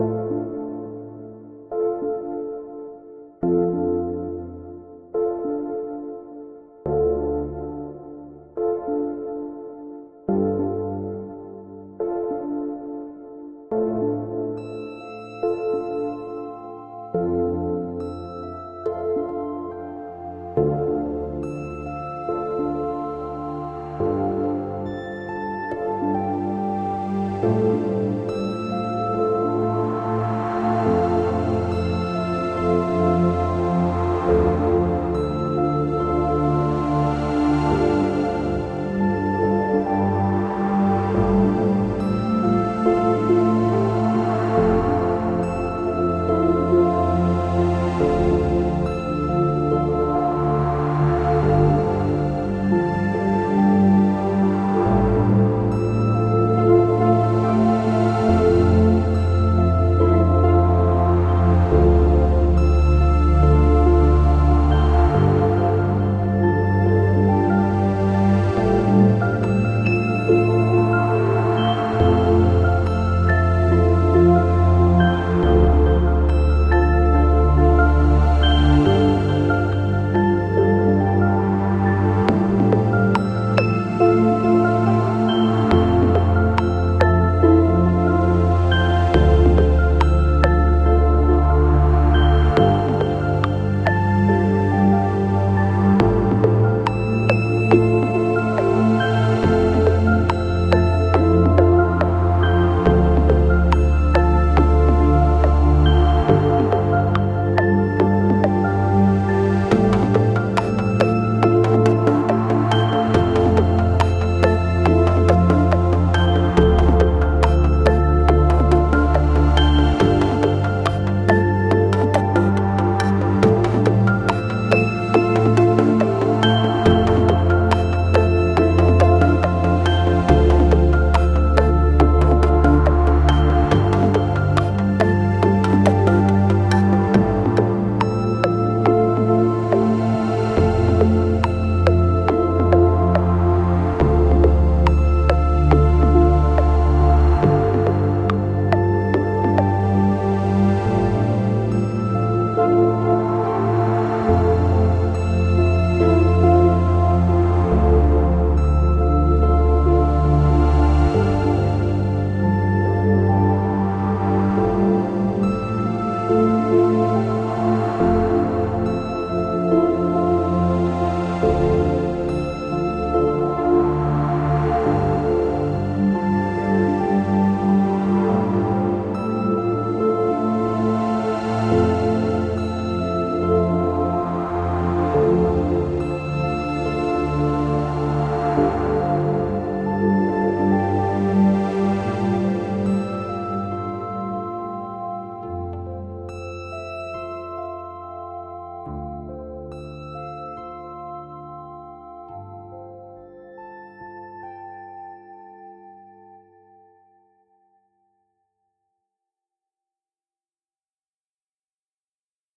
Drops, track with nice and warm sound. It can be perfectly used in cinematic projects. Warm and sad pad. Plugins Sylenth 1, Massive, Zebra 2. BPM 70. Ableton 9. 8 Midi Channels. You will have fun.
Music & Project Files: DOWNLOAD
ambient, atmospheric, chill, chillout, classical, deep, downtempo, drone, electronic, emotional, experimental, instrumental, melodic, music, piano, relax, space